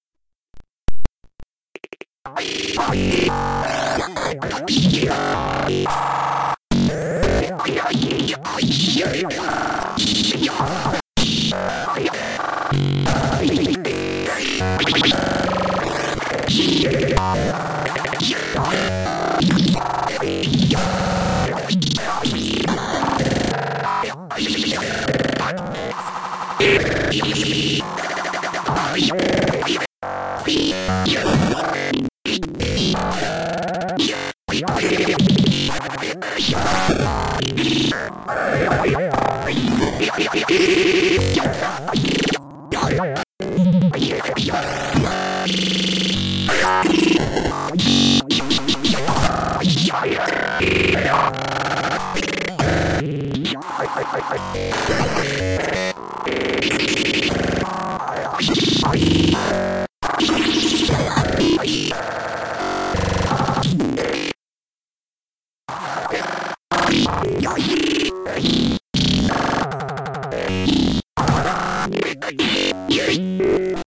A nother hardcore loop.
If i had to give it a girls name i would pick,
.... Lacy
breakcore, dancefloor, devastation, skinny